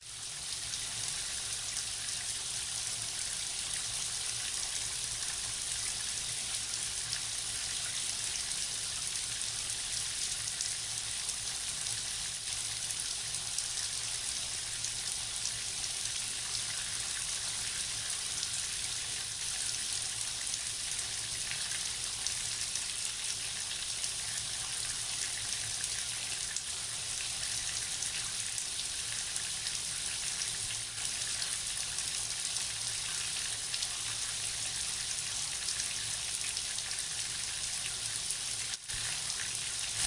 Simulação de audição de alguém com surdez severa no chuveiro.
chuveiro, simulao, surdez